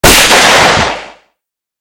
hit explosion 2
explosion, impact, explosive, Bang, tnt, explode, boom, bomb